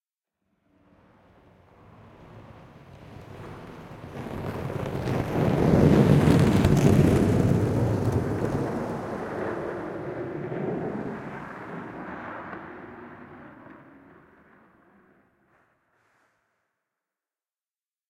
meteor flyby
meteor sound fx, the effect was recorded playing with a cardboard and a brush, and some reverb and Eq fx were added in adobe audition.